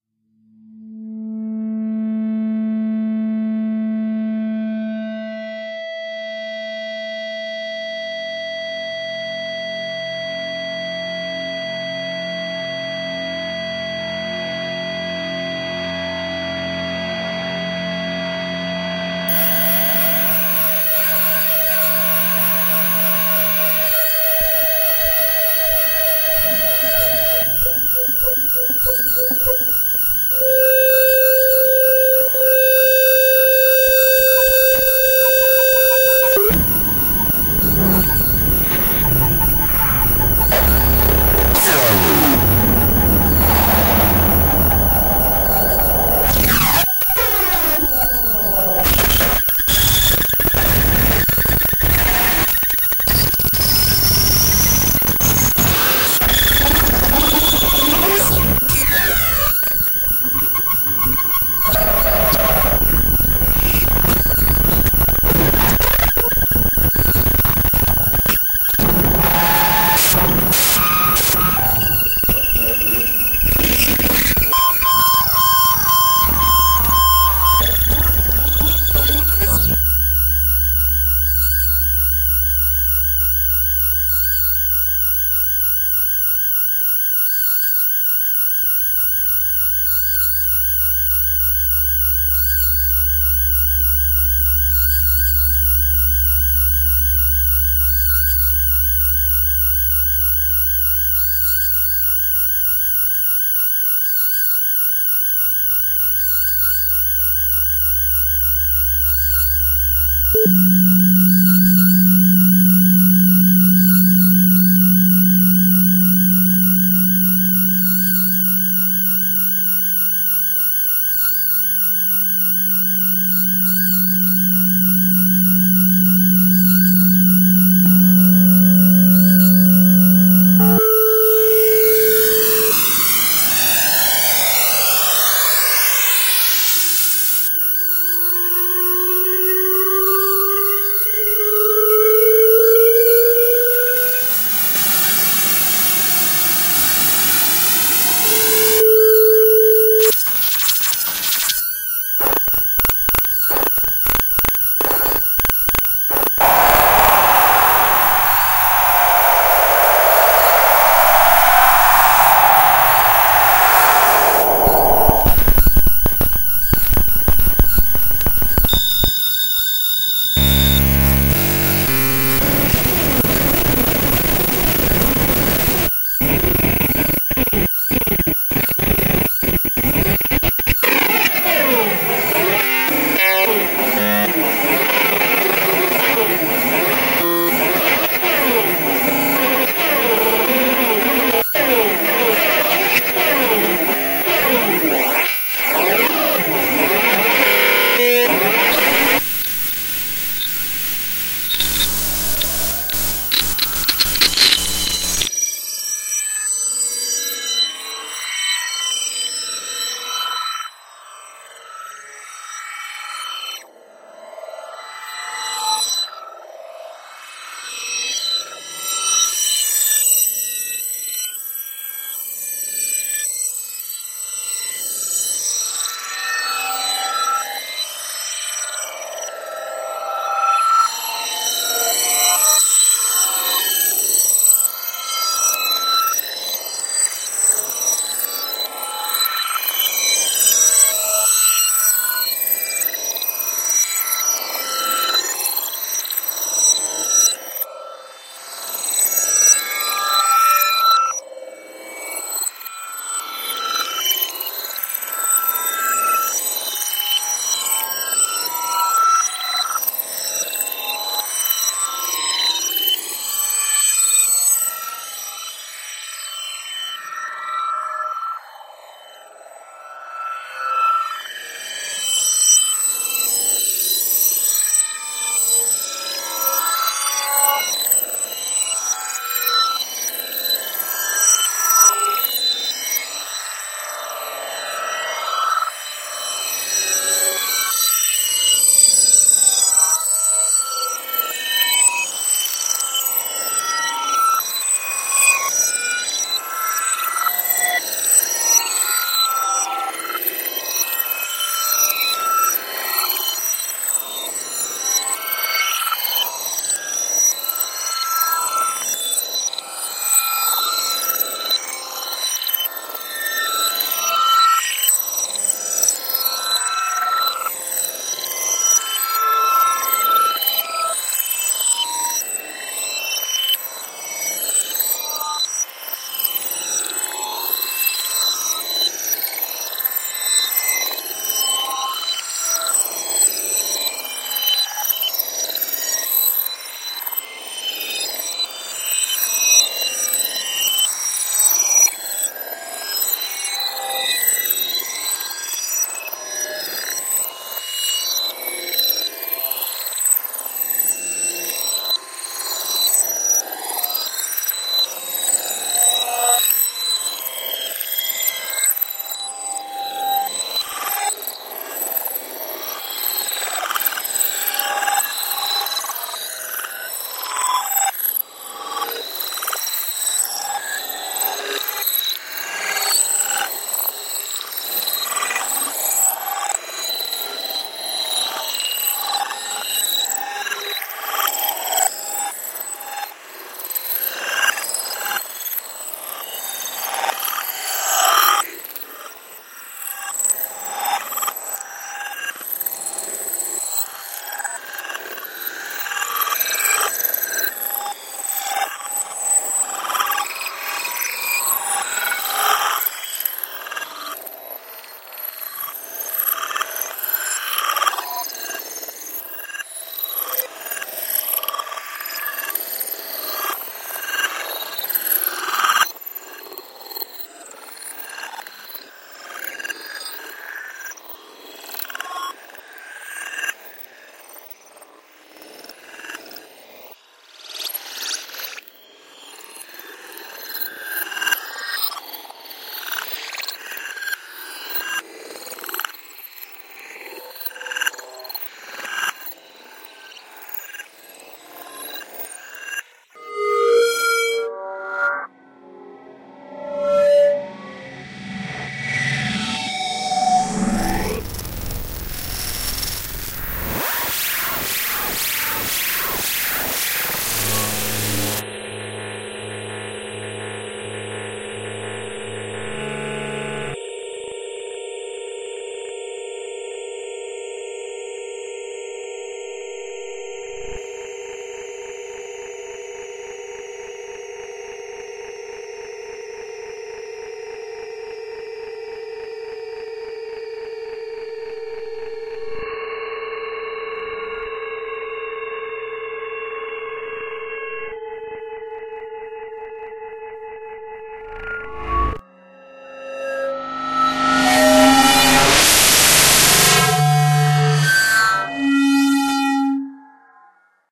wierd sound
a compilation of effects from this site merged together with effects added. noisy, nasty and quite cool
feedback, screech, noise, weird, loud, clash, smash, mess, alien